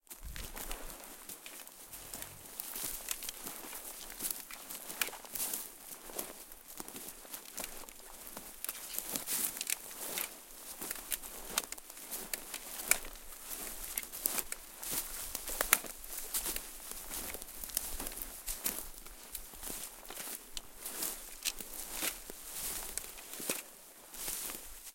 Soldier in World War II gear crawls in a Finnish pine forest. Summer.